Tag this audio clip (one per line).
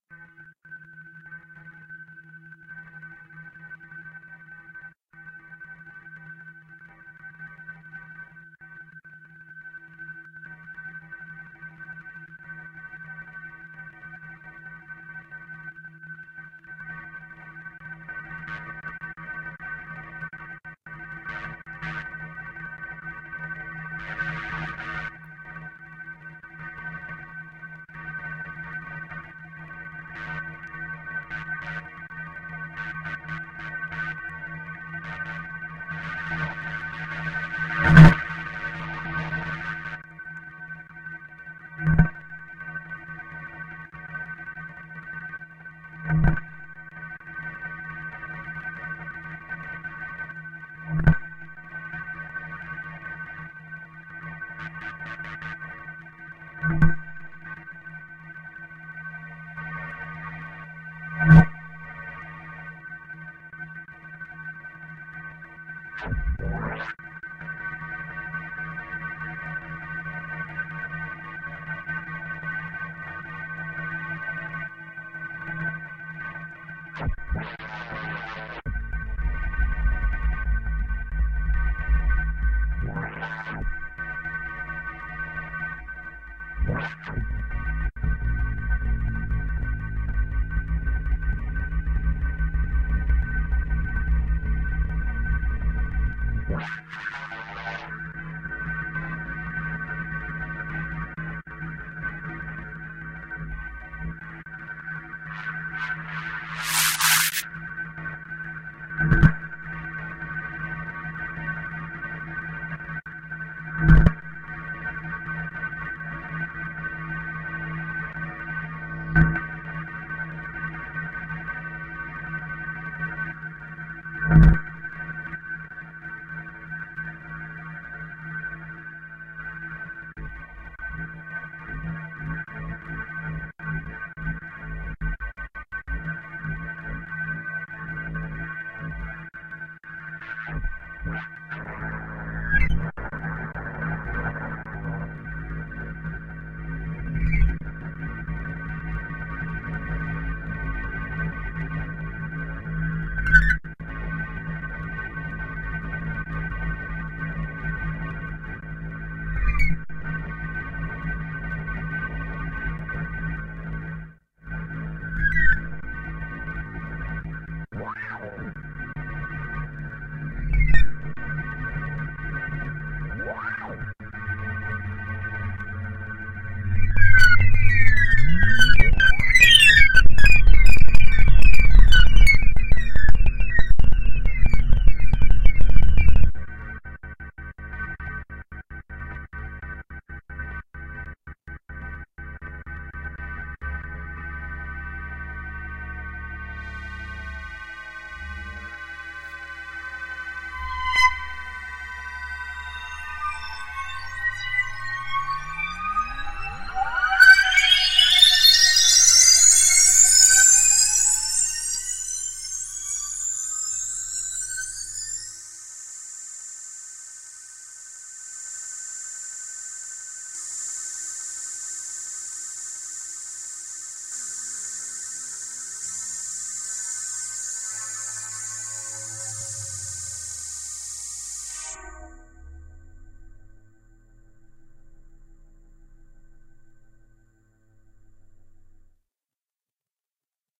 drone
stutter
modular
digital
glitch
electricity
electronic
experimental
sci-fi